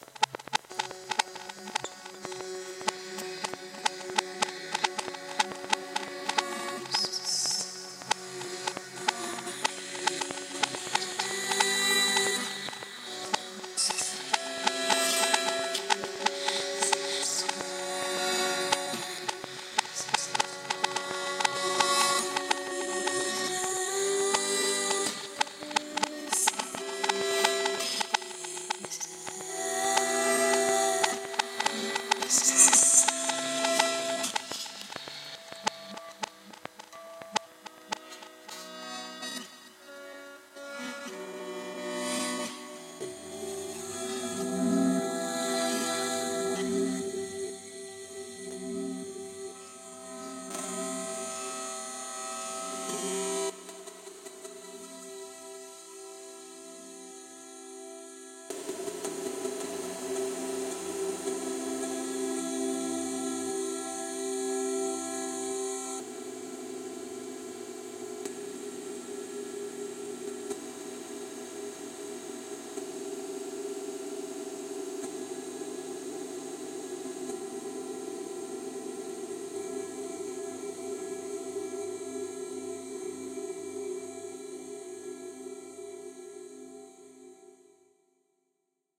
this is an excerpt from a piece I made on acoustic guitar with vocals. I then took the track and reversed it transposed it in certain areas at different speeds over processed with effects
texture, experimental, voice, transposed, ambient, reversed, music, atmospheric, effects, backwards, female, singing, sound, vocal, textured